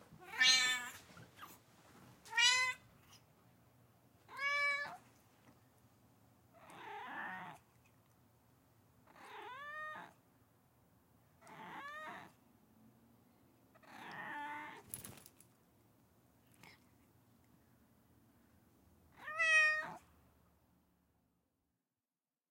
Otis the Cat
My cat Otis "talks" to us every time we come into the room. He has a lot to say...
kitty, meow